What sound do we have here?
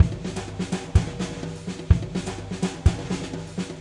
Another Numerology drum loop